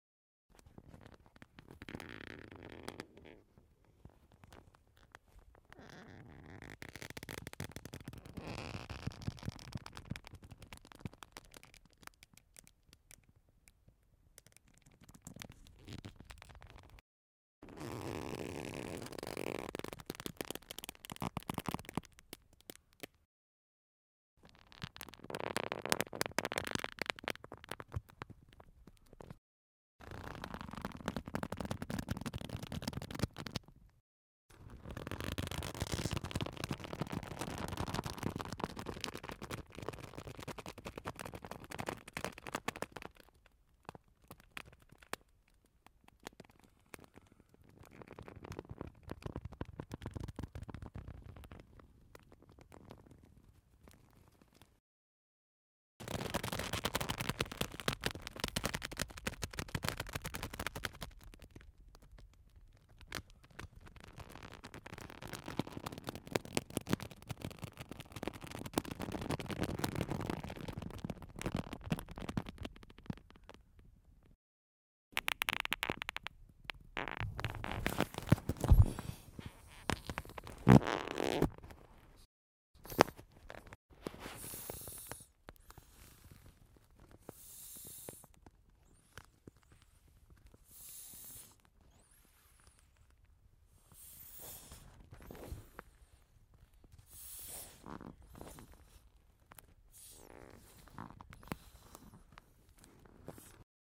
flotador de plastico
close-up, plastico, squeeze